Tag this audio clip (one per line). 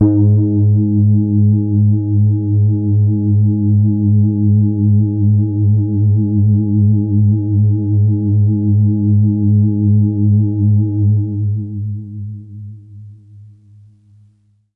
synth
bass
multi-sample
soft
electronic
mellow
waldorf
lead